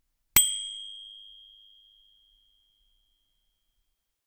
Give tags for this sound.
bicycle bike bell cycle

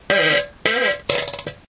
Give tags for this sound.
flatulation fart flatulence explosion poot gas

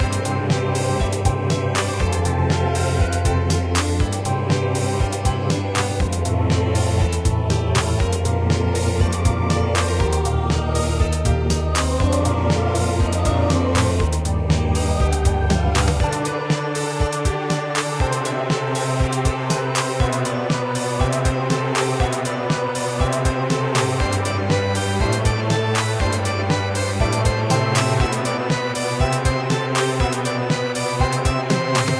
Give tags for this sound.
games tune gameloop sound game synth melody loop organ music piano

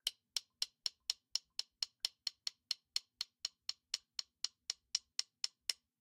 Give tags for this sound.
Domestic Fork FX Hit Hits Kitchen Knife Loop Metal Metallic Pan Percussion Saucepan Spoon Wood

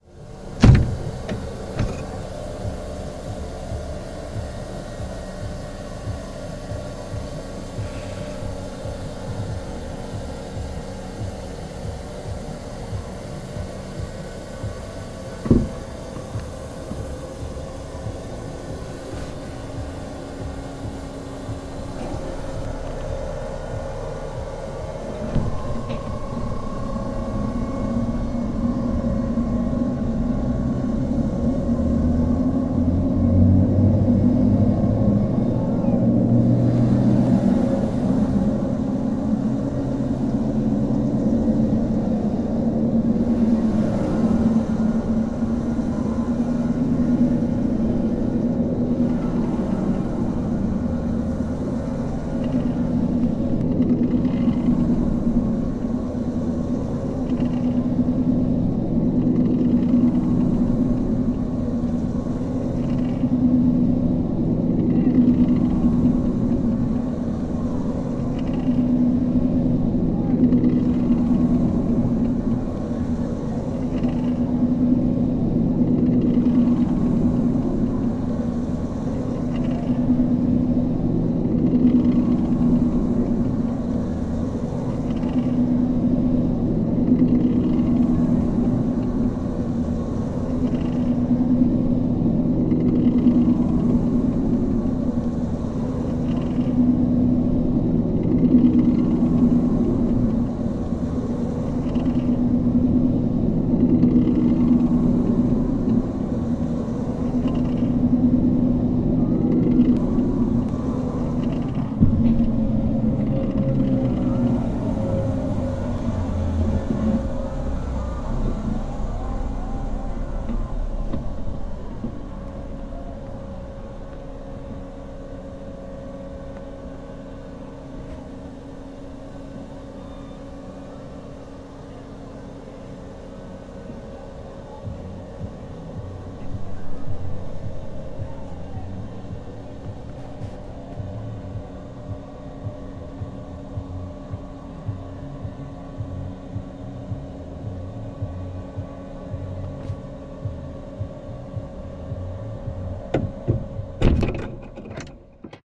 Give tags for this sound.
rollercoaster ride coaster roller-coaster coasters processed machines machine fair

